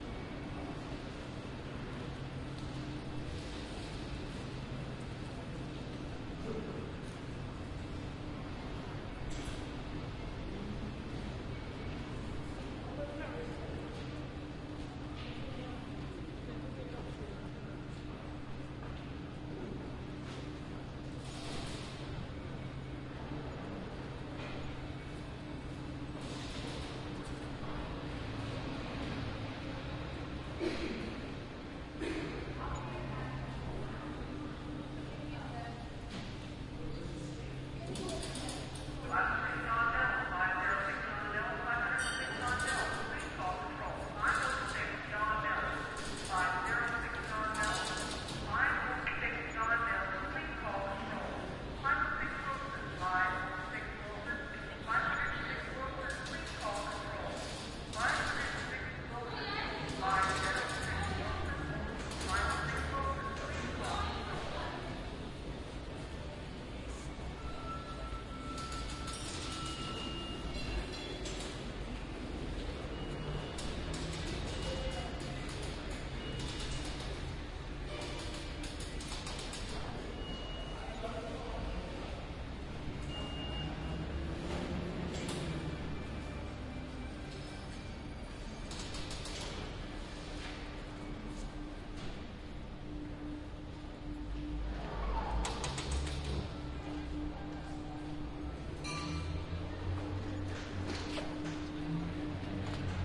bathurst station upstairs 110111
Binaural stereo field recording, standing in a subway station.
subway, field-recording, station, binaural, stereo